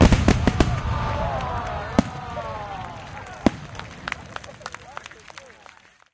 fireworks impact27
Various explosion sounds recorded during a bastille day pyrotechnic show in Britanny. Blasts, sparkles and crowd reactions. Recorded with an h2n in M/S stereo mode.
explosives, explosions, crowd, field-recording, show, fireworks, display-pyrotechnics, bombs, pyrotechnics, blasts